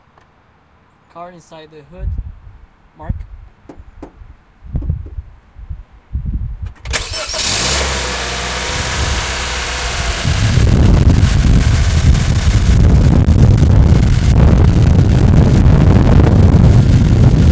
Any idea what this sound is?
4civic engine
Stereo, H4N
In a small sedan, cloth seats, in a parking lot by the highway, Two people inside. Civic starting from inside the car, passenger seat.